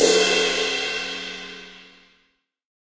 right crash
crash, percussion, drum kit
percussion, kit, percs, percussive, drums, drum, crash, metal